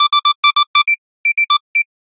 simple beep music
beep, music, signal